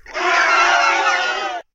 STUPID SCARED PEOPLE
Meant to sound like a bunch of scared stupid teens(?) screaming (me). All parts recorded with a CA desktop microphone. And layered in Audacity. One funny girly scream stands out from the other mostly male screams. (Not so) Perfect for Halloween.
screaming, scream, aaaaaah, scared, fear, aah, stupid, funny, scary, aaah, yell, horror, silly